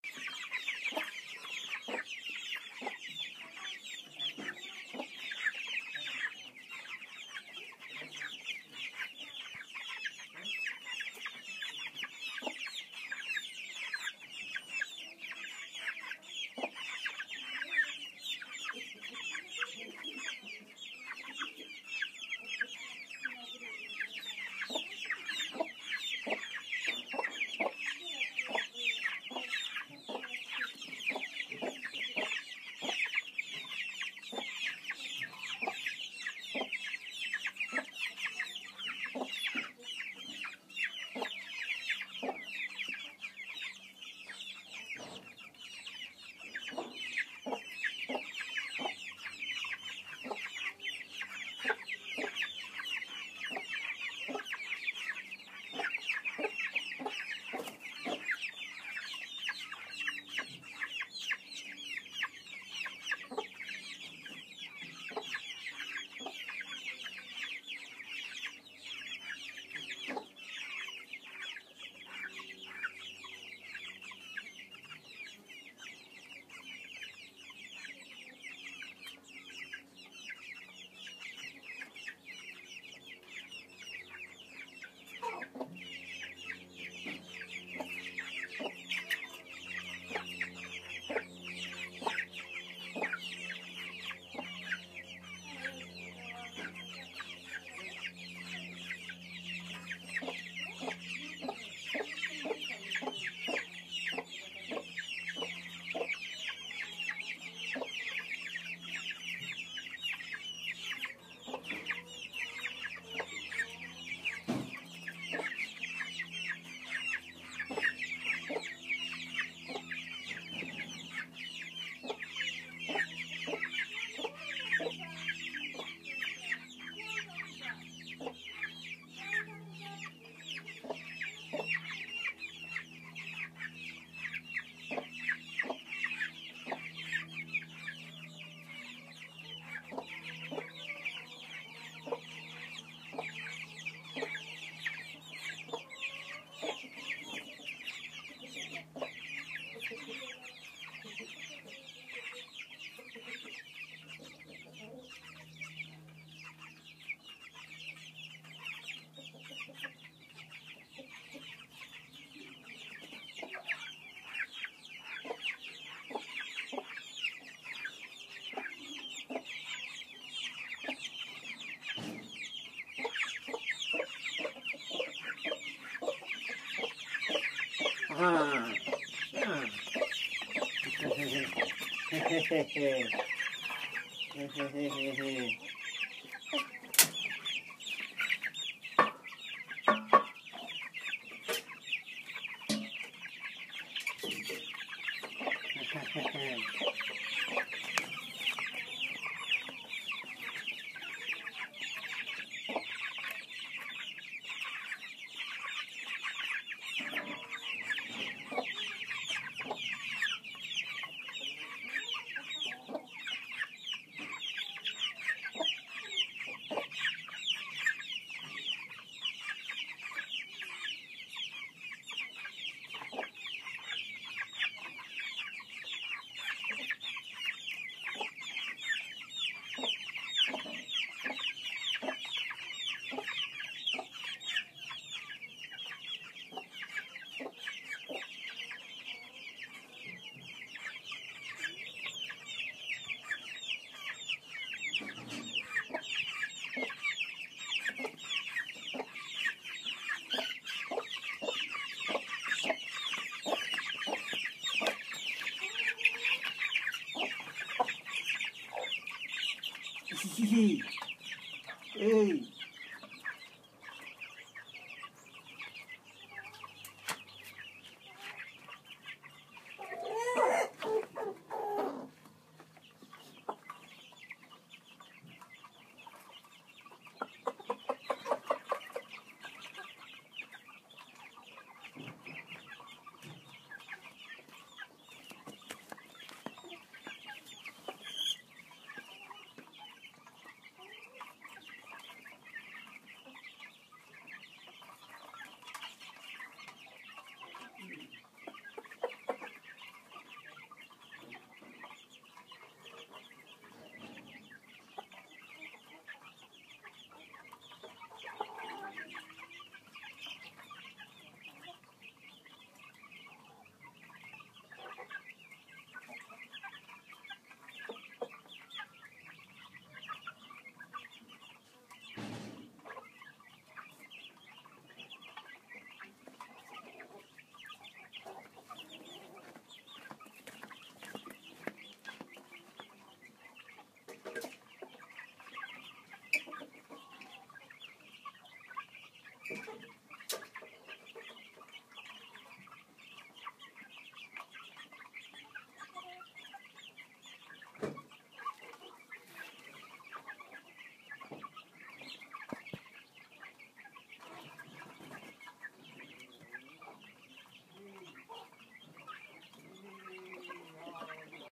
30 Chicks and 1 Hen Feeding
30x 3-week old chicks and 1 Hen just being themselves, then they get fed and all goes a little quieter for a while.
Chick, chickens, chicks, corn, countryside, eating, feeding, hen, June, rural, summer, village